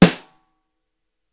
full 14" snare drum - double miked compressed and limited! Massive!!
snare3, heavy